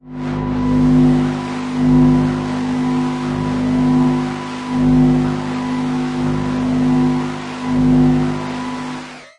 GL 03 filt1
Processed ground loop
loop, processed, ground